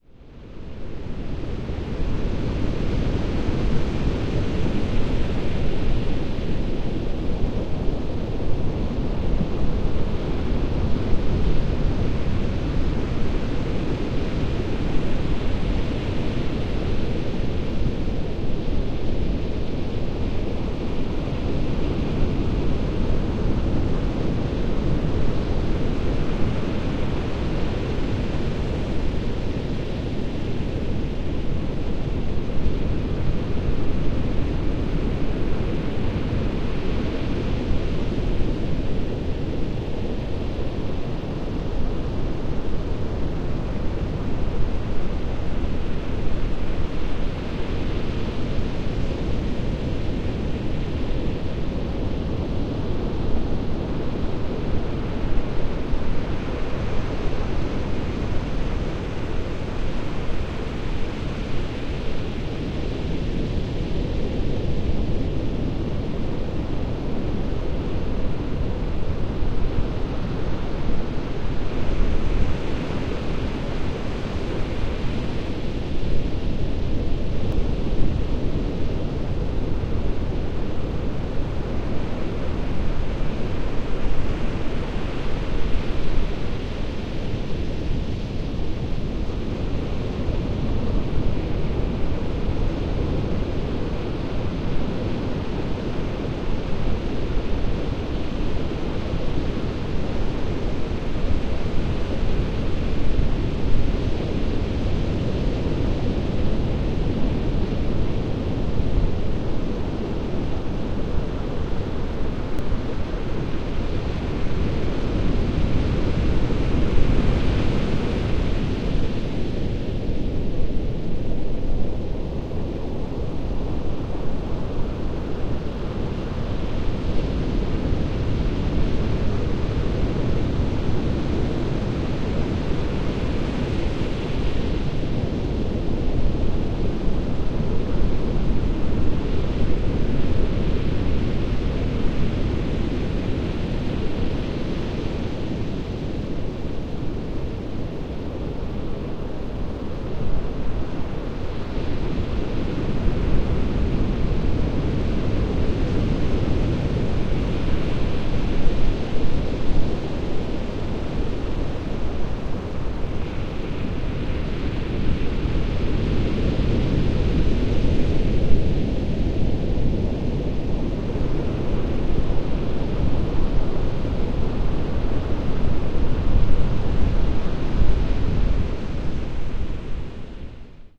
Digitally recorded in stereo at Nauset Beach on Cape Cod using a Fostex FR-2LE field recorder with one track via a Shure Beta 57A and the other via the Fostex internal microphone.
Ocean, Beach